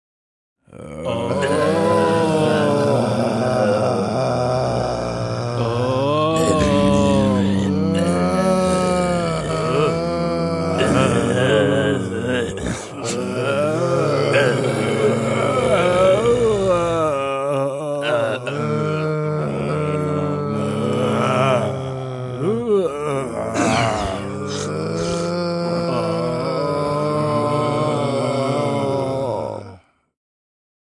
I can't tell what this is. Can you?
This is my "ZombiesMoaning" recording minus the reverb. 4 male voices were recorded in the studio on a Shure KSM42